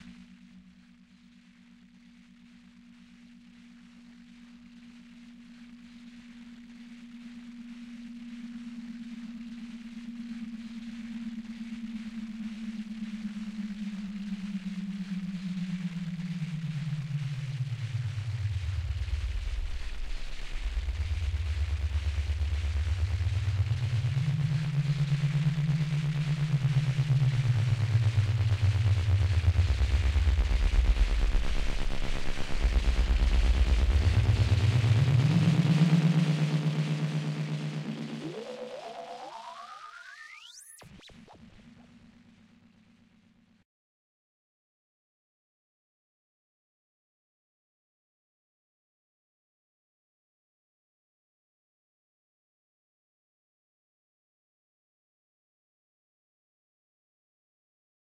long sweep up fx 6
long sweep up fx usefull for film music or sound design. Made with the synth Massive, processed in ableton live.
Enjoy my little fellows
ambient, strange, sci-fi, tension, synth, pad, up, sfx, noise, uplifter, sound-design, effect, electronic, white, long, atmosphere, fx, sweep